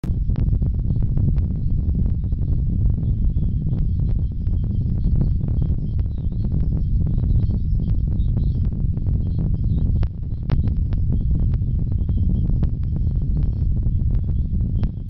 Low Rumble
Found while scanning band-radio frequencies.